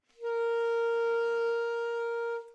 A straight concert B flat on the alto sax.
flat, sax, b, smith, howie